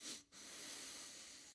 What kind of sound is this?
Sonido de oler profundamente